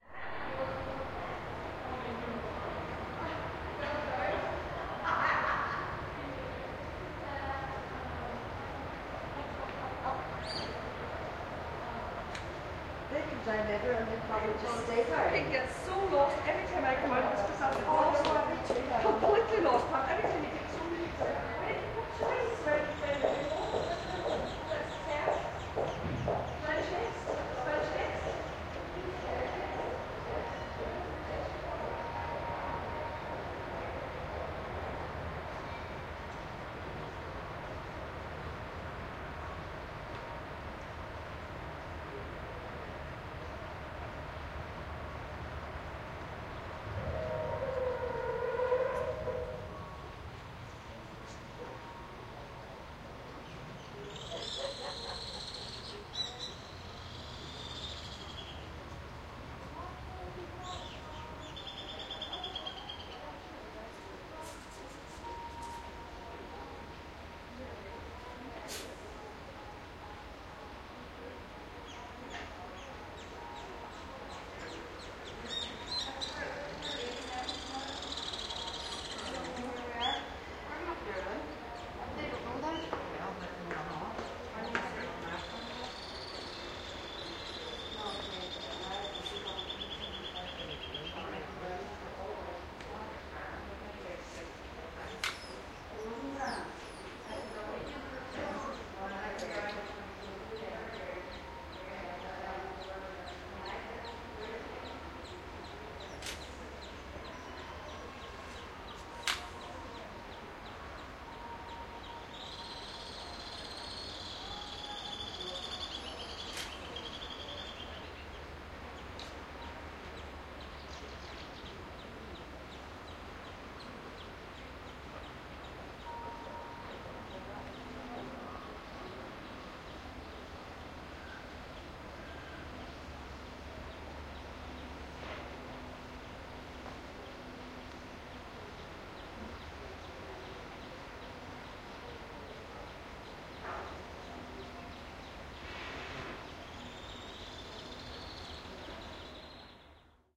importanne hotel ambience dubrovnik 050516
05.05.2016: recorded at around 18.00 p.m. in Dubrovnik/Lapad district (Croatia). The ambience in front of Importanne Hotel on Cardinala Sepinca street: sounds of birds, voices of tourists.
hotel, Lapad, birds, fieldrecording, tourists, ambience, Croatia, voices